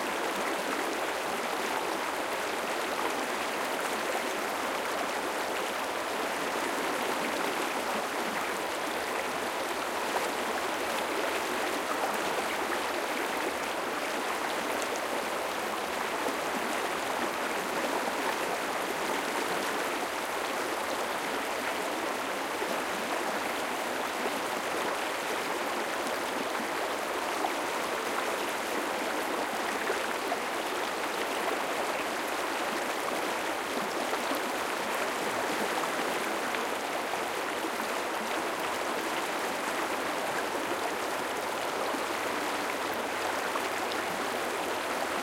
A nice loopable recording of the creek at bobolink trail in Boulder, Colorado.